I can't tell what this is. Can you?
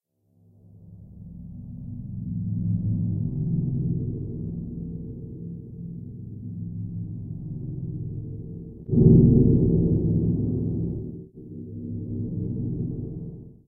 Thunder sound synthesized from a randomly generated tone, and processed (in audacity) with: Multivoice Chorus, Harmonic Sythesizer, tons of Gverb, 2-second delay, and fade-in/fade-out where appropriate. Again, this is my very first attempt, I'll keep trying to make more realistic samples like this.